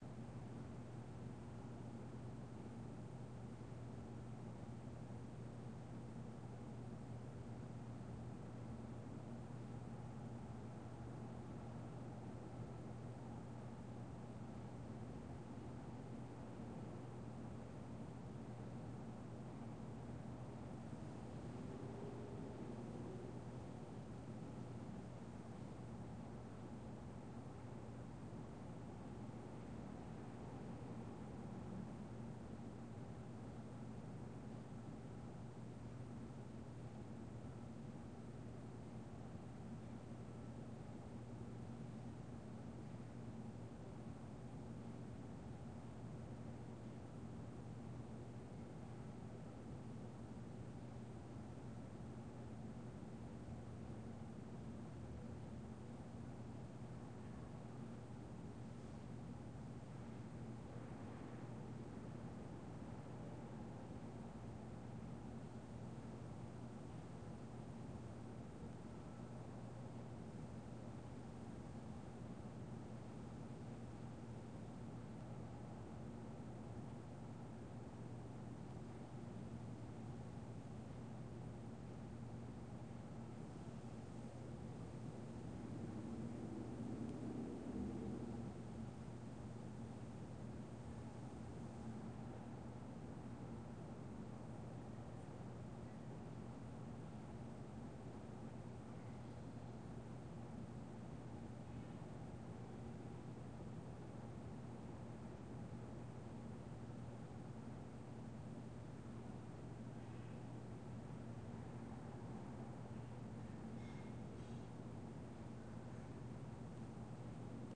Quiet ambience in a small church sanctuary with no one around.
background-sound, atmospheric, hum, atmosphere, atmo, ambiance, sanctuary, ambience, white-noise, soundscape, roomtone, wooden, atmos, ambient, church, small, background, general-noise